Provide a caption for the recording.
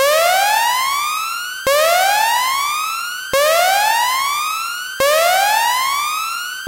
VIZZINI Romain 2018 2019 Emergency alarm
I wanted to recreate an emergency alarm sound on Audacity. So, i have generated a noise (base on A : 440hz) with a sawtooth shape and Linear interpolation. Then, i added some effects, reverberation (by slightly decreasing the basic parameters, to avoid that the effect is too loud), but also by amplifying the bass and treble. After, I had to remove the saturation (-5 db) and copy the sound in a loop to reproduce the sound of an emergency alarm.
Code typologie de Schaeffer : N’’
Masse : son cannelés avec mélange de sons complexes et de sons toniques (avec des hauteurs de note)
Timbre harmonique : rond, acide et éclatant
Grain : Lisse
Allure : non
Dynamique : Abrupte
Profil mélodique : légèrement serpentine
Profil de masse : /
alarm, alarm-clock, danger, emergency, siren, warning